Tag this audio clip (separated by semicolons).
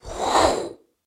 swoosh; swooshes; Woosh; wooshes